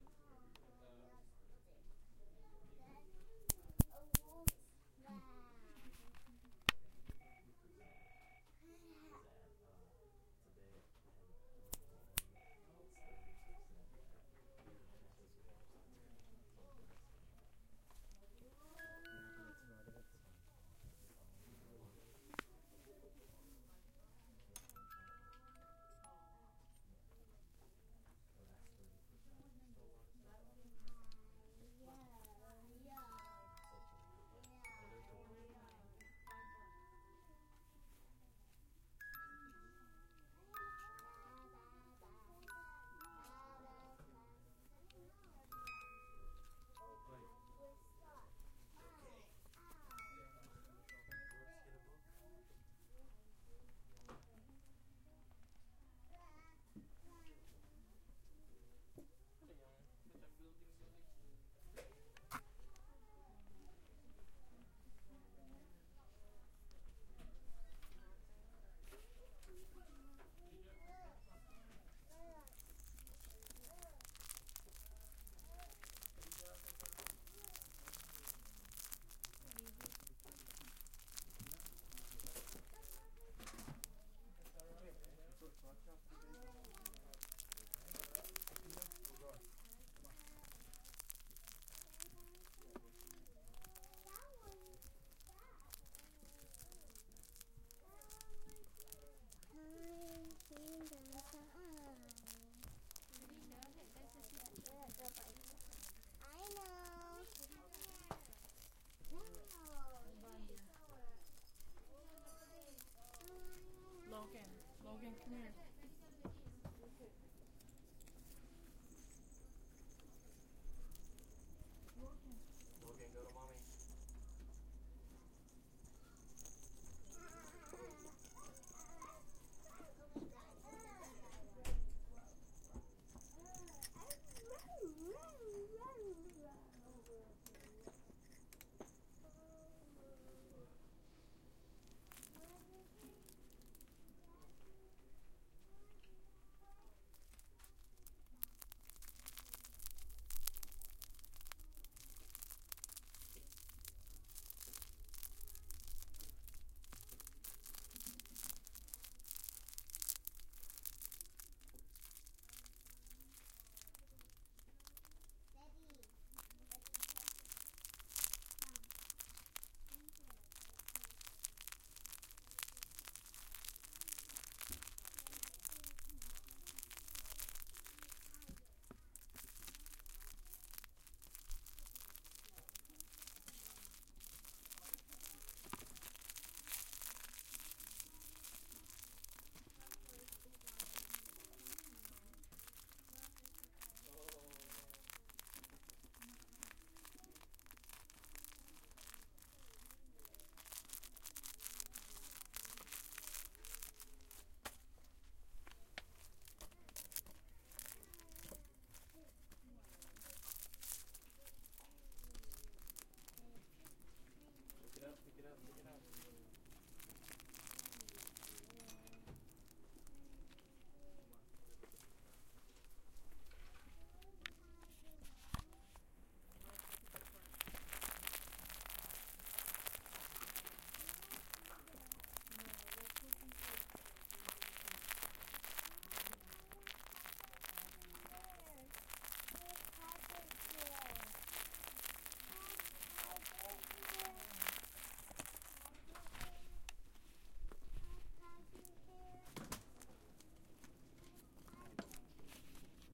light music box sounds, a rattle, crinkly toys
not the best SNR. one in a series of recordings taken at a toy store in palo alto.
foil, music-box, tinkle, crinkle, assorted-toys, toys, lamaze, children, rattle, toy-store